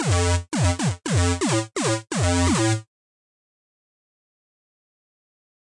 Bassline 1 170BPM
synth, 170bpm, bassline